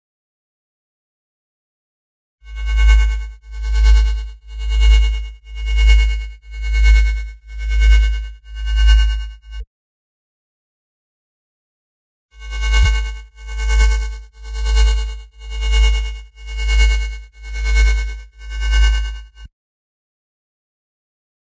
Levitating FX
Made on FM8 with some volume envelopes!! Directly synthesized, not layered.
FX, Imaging, Machine, Sci-Fi